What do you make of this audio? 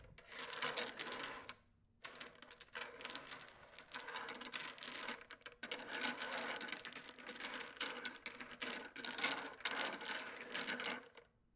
domino shuffle
domino, shuffle, stones